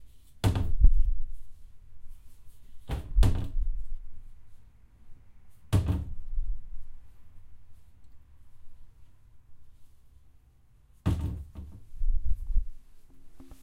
closing a cupboard
breakfast, cupboard